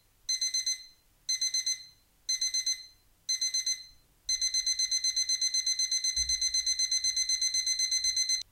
alarm clock short
I´have already made a Sound of alarmclock. This is a short Version of it.
alarm, alarm-clock, beep, buzzer, clock, electronic, morning, ring, wake, wake-up